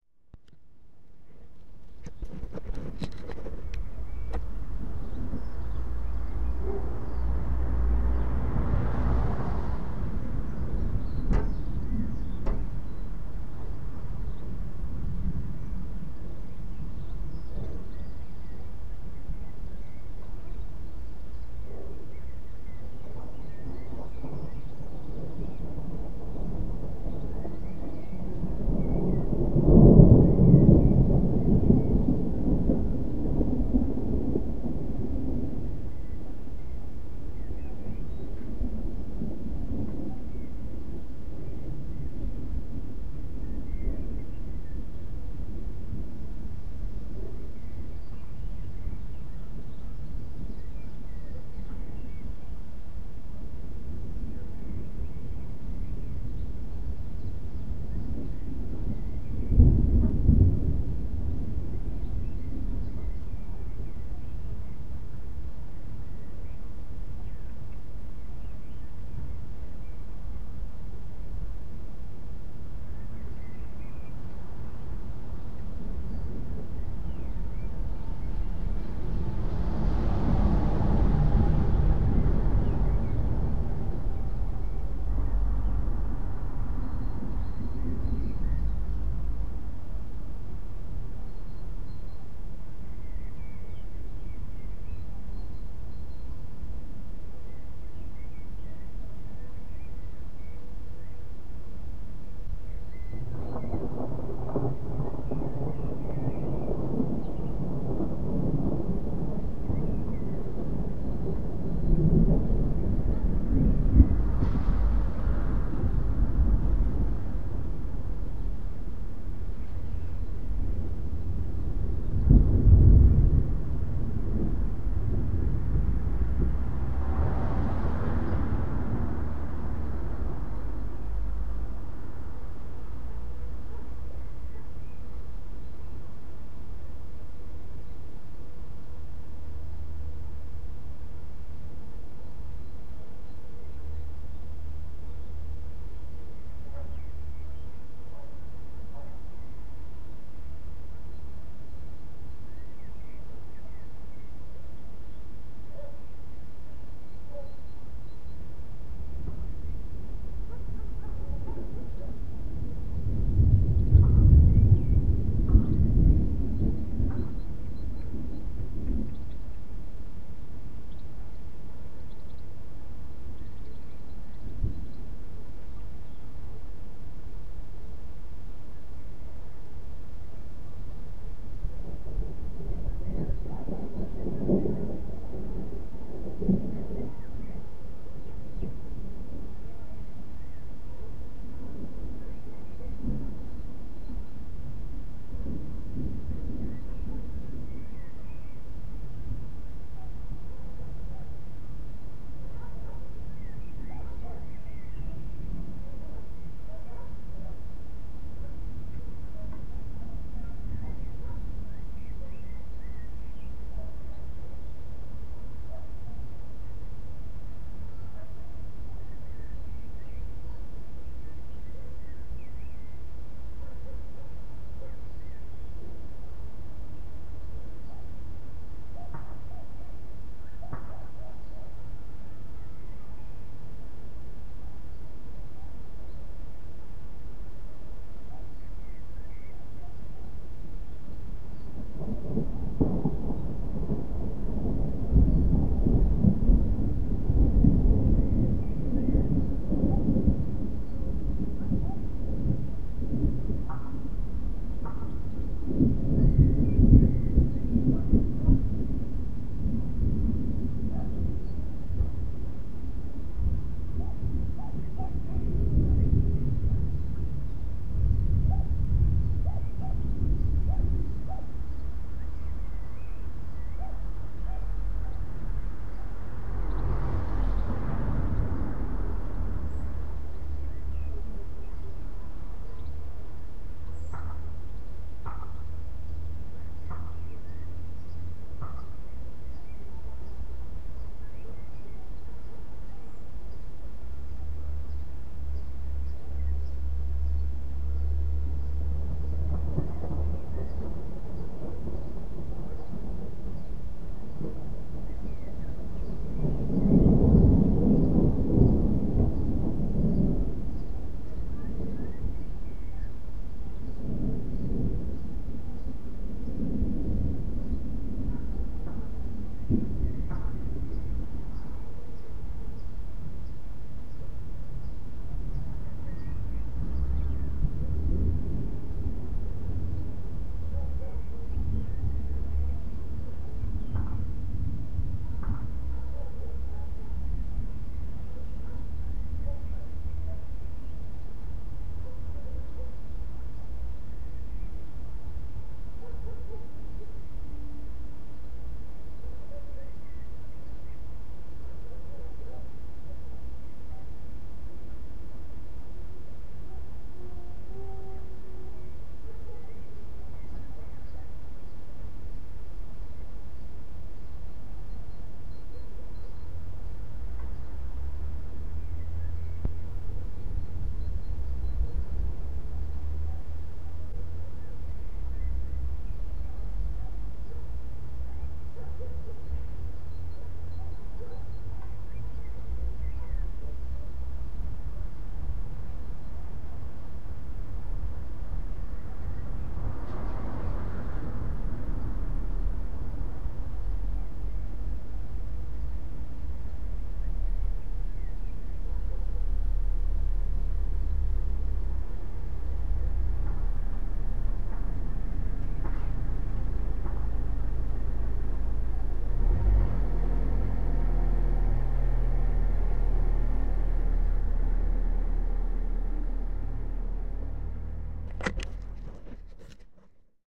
Here are some distant thunder sounds from a thunderstorm. Recorded from XVII distinct of Budapest by MP3 player, smoothed and normalized.